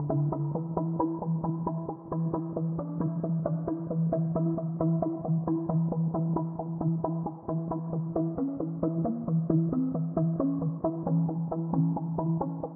Dark Time Sequence
Sequence running from a Doepfer Dark Time through the Formant Classic Synth in Ableton 9. Reverb and Autofilter was added.
Doepfer-Dark-Time, Synth